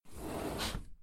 Door Close
Sliding door closing
close, sliding, door